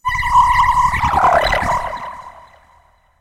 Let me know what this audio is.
HITS & DRONES 05
broadcasting, Fx